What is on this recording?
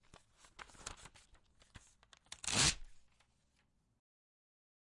Paper ripping
I'm a student studying sound and I and recording sounds this is one of the recordings.
This sound is of paper being ripped by hand.
tearing, paper, rip, ripping